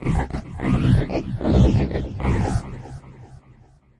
THE REAL VIRUS 11 - VOCOLOOPY - C1
A rhythmic loop with vocal synth artifacts. All done on my Virus TI. Sequencing done within Cubase 5, audio editing within Wavelab 6.
vocoded, vocal, loop, multisample